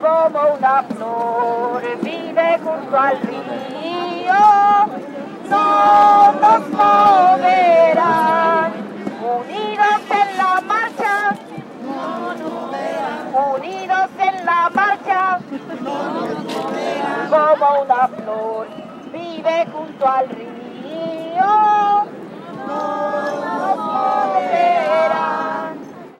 Woman singing "No nos moveran" (We Shall Not Be Moved) over megaphone, crowd responding. May Day immigrants rights demonstration in Chicago. Recorded with Sennheiser MKE 300 directional electret condenser mic on mini-DV camcorder. Minimal processing, normalized to -3.0 dB.
singing, crowd, field-recording, political, human, city, voice